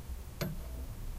replacing coathook
Coat hook makes nice ring noise as metal wire "n" hugs circular metal bar
bar, coathook, light, metal, noise, replacing, soft